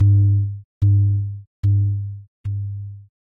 hi tom
sound created from a virtual 808 with lots of filters and compression